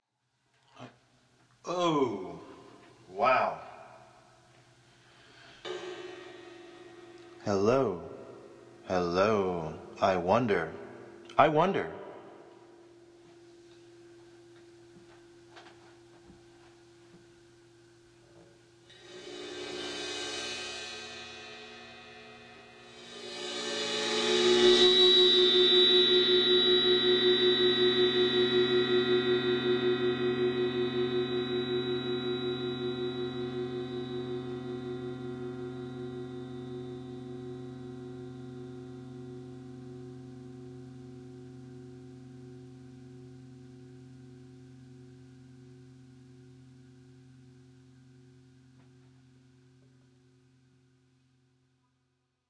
cYmbal Swells Royer-031
i was demoing the new Digital Performer 10.1 with a Royer R-10 pair in Blumlein array but wanted to check out one of the ribbons because it possibly sounded blown so i went ahead not bothering to create a new mono file. the mic was tracked through a Yamaha mixer into Digital Performer via a MOTU 624. i have various cymbals including a Paiste hi-hat and a Zildjian ride which i bowed or scraped. there is an occasional tiny bit of noise from the hard drive, sorry. it has the hiccups.
some of these have an effect or two like a flange on one or more and a bit of delay but mostly you just hear the marvelous and VERY INTERESTING cymbals!
all in my apartment in NYC.
cymbal-swell, cymbal-swoosh, ribbon-mic, Royer